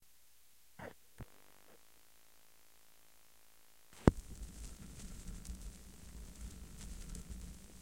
More record static.
analog, crackle